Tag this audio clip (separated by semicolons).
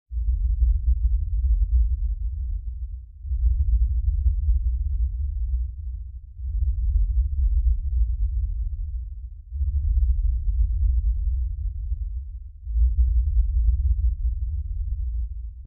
anxious; bass; breathe; creepy; drama; ghost; haunted; horror; phantom; scary; sinister; spooky; stress; tension; terrifying; terror; thrill